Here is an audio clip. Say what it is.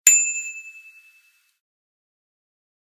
Just a sample pack of 3-4 different high-pitch bicycle bells being rung.